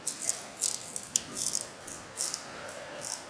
icicle tree
Moving the branches of a tree that is completely coated in ice.